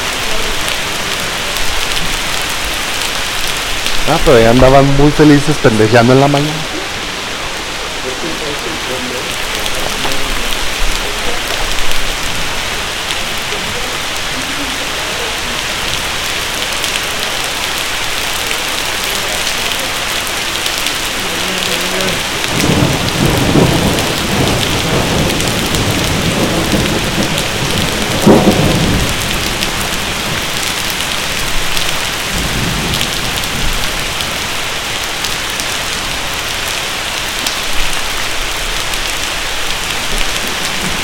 lluvia con truenos, algunas voces en ciertos momentos... storm with somw thunders